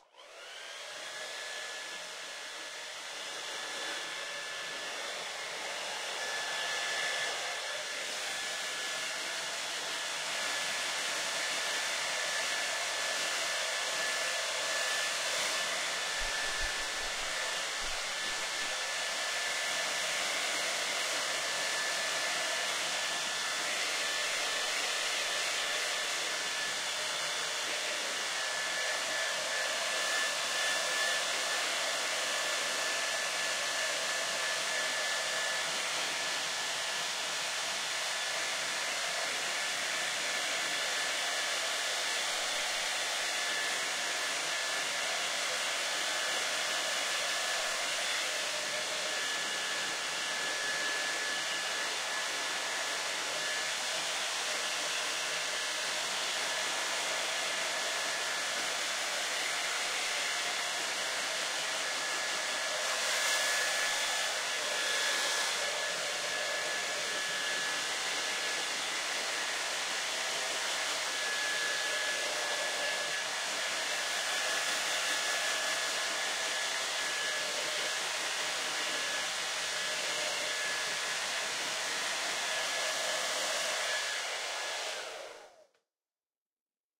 Just a standard issue hair dryer.
hair, dryer, personal